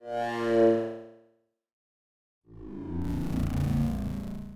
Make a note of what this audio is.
warpappear1-chiptone
8-bit, 8bit, arcade, chip, chippy, chiptone, game, lo-fi, retro, vgm, video-game, videogame